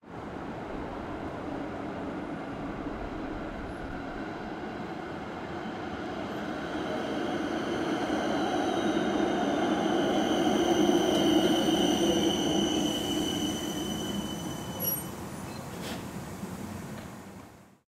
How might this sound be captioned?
Medium-traffic and a tram stopping
Tram, Trolley